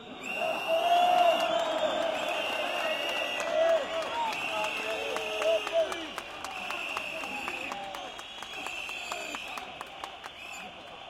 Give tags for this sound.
demonstration whistle

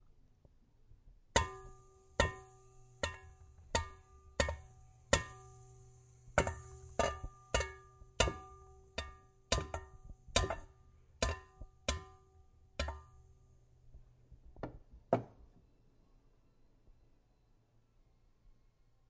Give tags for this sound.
pots metal clashing kitchen

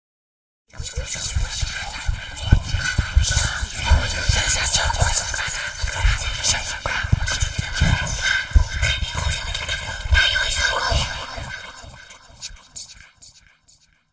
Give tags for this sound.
fantasy alien whispers human weird noise vocal windy noisy voice

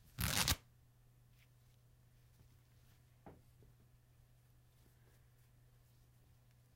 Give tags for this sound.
flesh rip tear

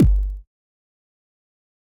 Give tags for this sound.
kick effects sound free

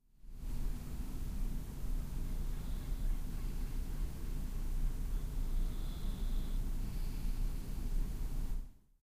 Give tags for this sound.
bed bird field-recording human street-noise

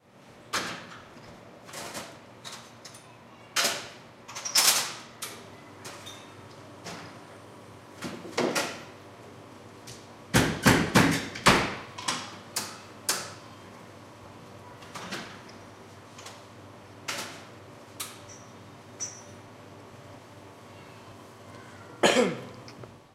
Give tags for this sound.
Breakfast Coffee Machine UPF-CS12 bar

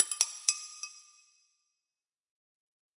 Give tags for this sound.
metal rhythm ceramic percussive drum percussion chime bell groovy

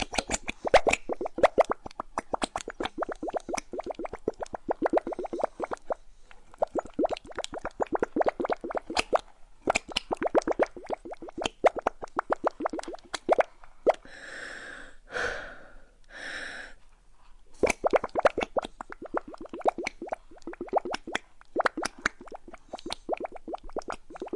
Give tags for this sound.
potion bubble poppino bubbling

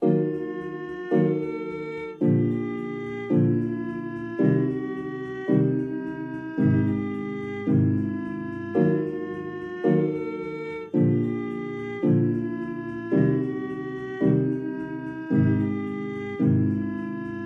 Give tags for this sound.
ambience atmosphere creepy intimidating midi music

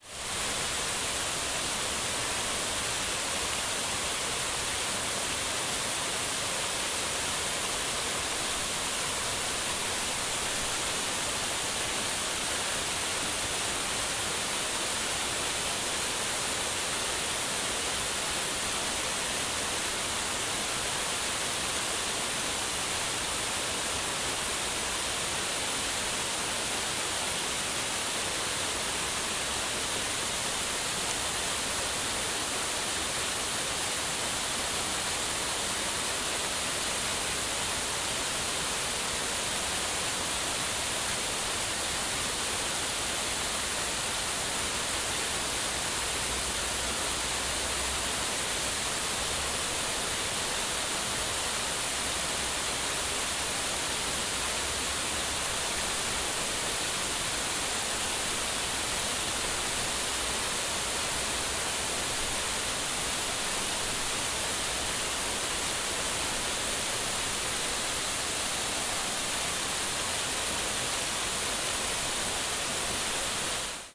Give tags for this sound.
field-recording fountain spain waterfall

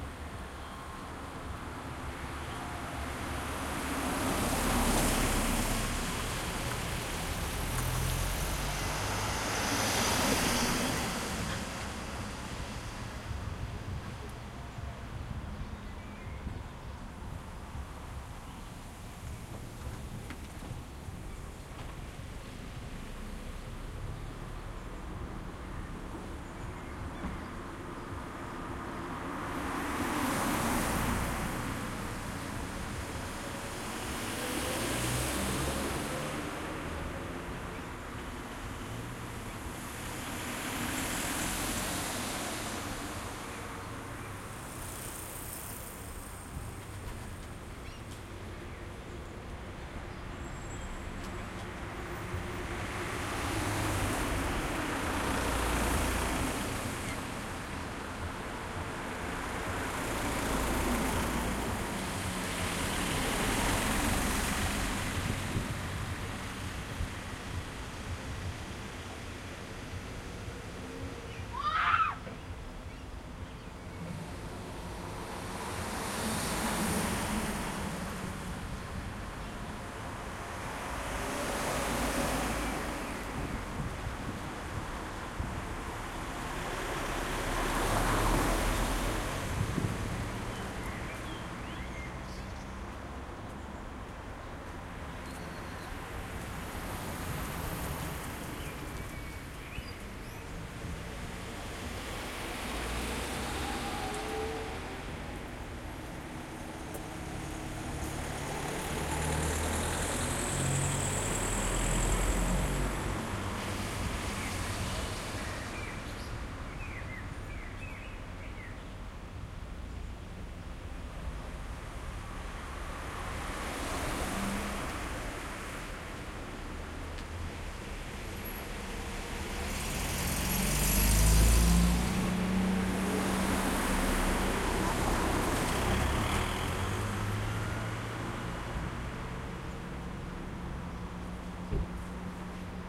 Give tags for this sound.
ambience ambient bike cars field-recording people street

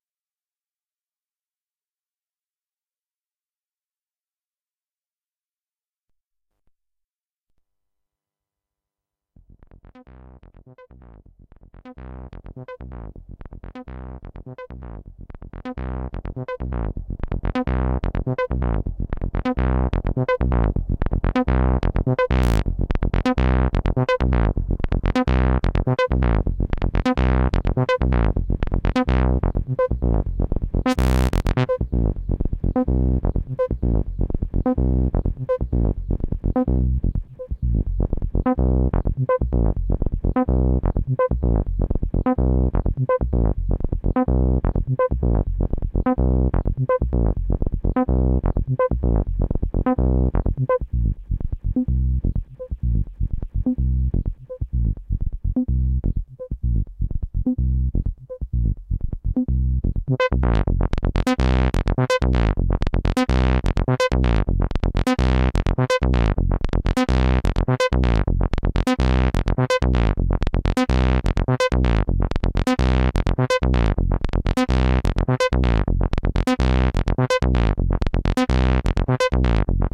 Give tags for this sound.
analog random fx future fr-777 retro noise

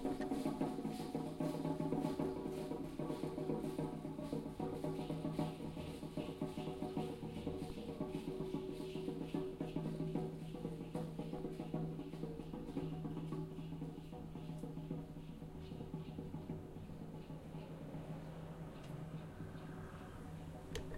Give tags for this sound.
Hermosillo Dancers Fariseos Sonora Yaqui

